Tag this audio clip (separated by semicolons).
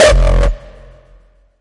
hardstyle; kick; rawstyle